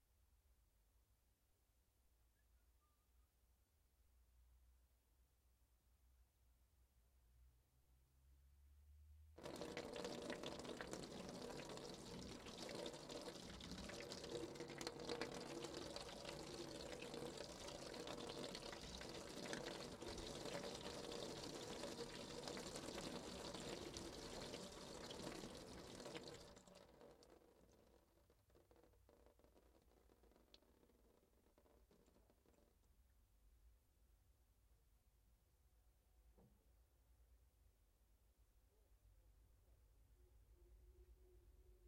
water on metal and glass
GLASS, Liquid